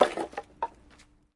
Kicking a pile of wooden blocks they tumble down
I kicked a pile of wooden blocks and the tower tumbled apart.
wood, hit, tumble, blocks, wooden